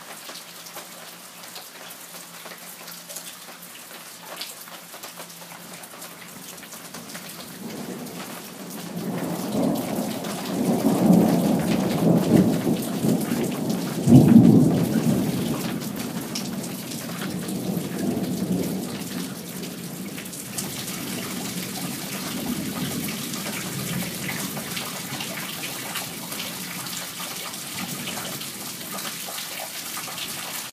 Southern Utah Summer Thunderstorm
During late July and August monsoonal moisture flows pulse into Southern Utah and produce sometimes heavy thunderstorms. Field recording of a storm with rivulets of water striking rocks and a prolonged thunderclap. Recorded with iPhone 5s.
lightning, southern-utah, thunder, thunder-storm, thunderstorm